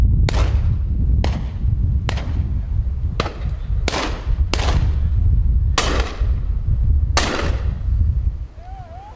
Several shots very windy conditions3
Several shots taken from a over-and-under shooter during Pheasant shoot in very windy conditions in a deep valley.
bang, discharge, fire, firing, gun, gunshot, over-and-under, pheasants, season, shoot, shooting, shot, shotgun, side-by-side, windy